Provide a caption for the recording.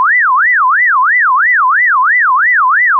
these are Trap warbles that I made by using my dad's sound testing equipment I tried using them for a beat but it didn't work out this is my 1st pack uploaded to here! enjoy! I will upload all work from my failed projects from here on out I do a lot more than just trap I rap to all kinds of beats! thanks!
do-not-have-to-credit, failed-projects, recyclable, trap-warble